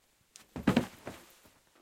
9 impact falling person
Czech, Panska